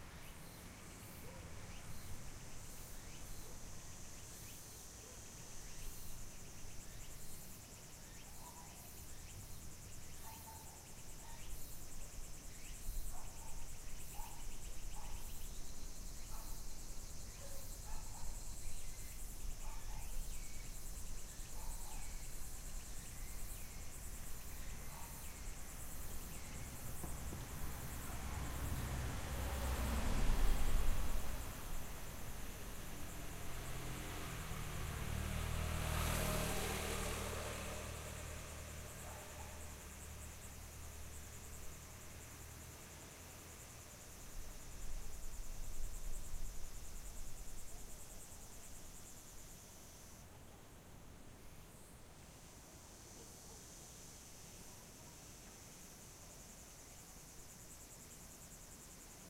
beitou forest road
nature sounds in a forest in beitou, taiwan
field-recording, forest, jungle, nature